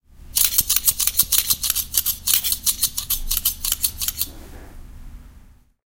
mySound TBB Tuncay
Sounds from objects that are beloved to the participant pupils at the Toverberg school, Ghent
The source of the sounds has to be guessed, enjoy.
belgium, cityrings, toverberg